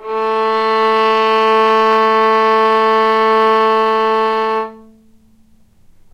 violin arco non vib A2
violin arco non vibrato
arco, non, vibrato, violin